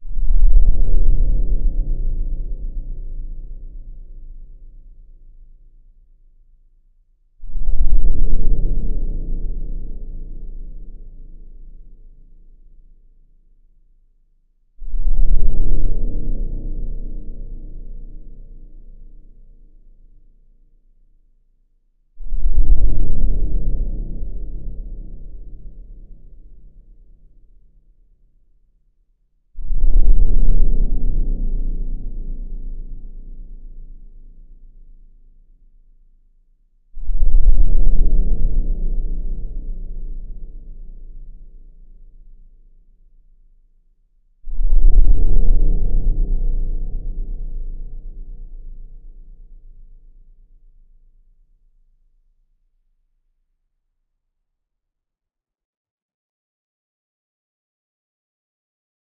A dark suspenseful drone